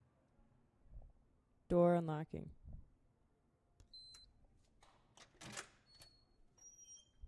Coming into building
Putting card up to card reader to get into building
access, building, card